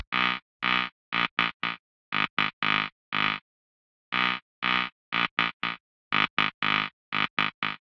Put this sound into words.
bass f e dd 120bpm delay-11
bass, club, compressed, dance, distorted, dub-step, effect, electro, electronic, fx, house, loop, rave, synth, techno, trance